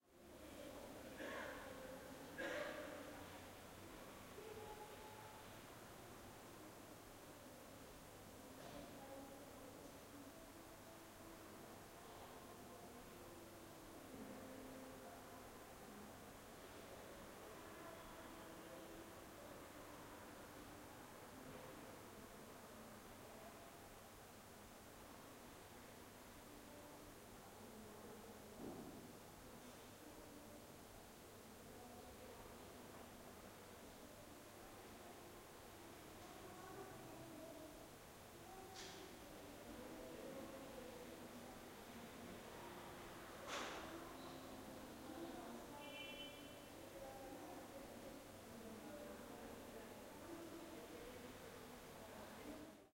0403181107 chiesa e arrivederci roma
18 mar 2004 11:07 - Inside a church. If you amplify the "silence" of the church you can hear, over the mic hiss, the outside sounds: car horns, voices and a saxophone playing "Arrivederci Roma".
church, field-recording, hiss, noise, silence